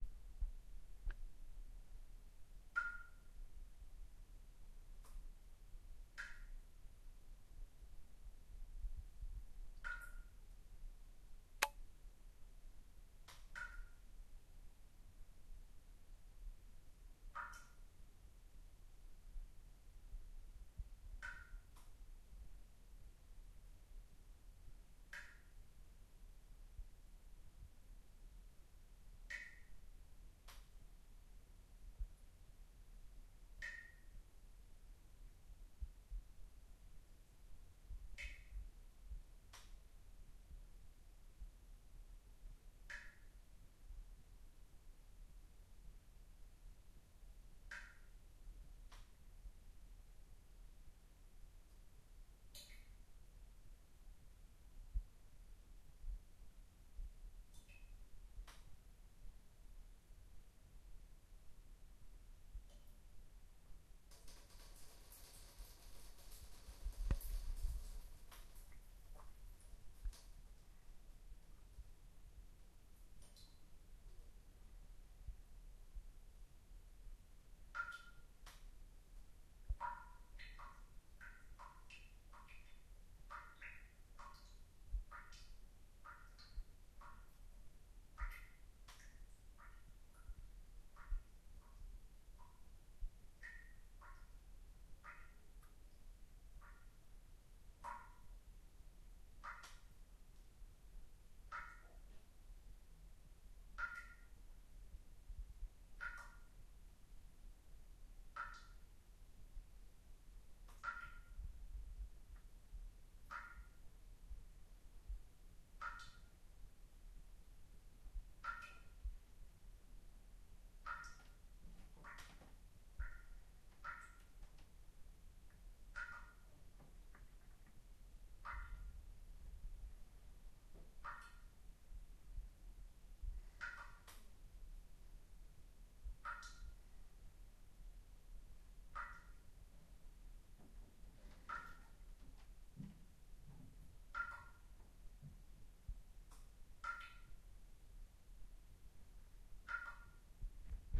A satisfying slow-drip of water droplets. Recorded in an old shower with an H1 Zoom.
There's a click near the beginning, and a section near the middle where the droplets speed up, so if you want to use it for ambiance a little editing may be required.